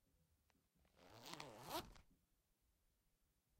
Sonido mientras se abre una cremallera. Sound of a zipper opening.
Zip, Cremallera, Zipper